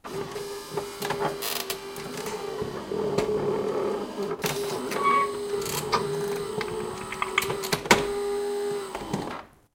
Coffee machine - Mechanism 2
Saeco Incanto Delux doing it's thing. Various noises it makes.
buzz, clank, coffee-machine, electro-mechanics, saeco